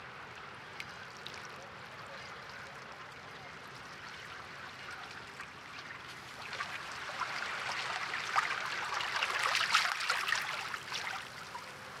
Water 001: A mono recording. Recorded at San Francisco's Aquatic Park October 2009. Lower frequencies and (some) ambient noise removed.Length: 00:12@120bpm
ambient, bay, california, field-recording, sf, sf-bay-area, usa, wave